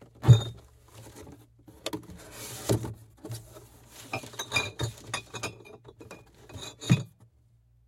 Place and Open Box of Bottles FF400
Thud of putting down box, opening box, glass bottles clinking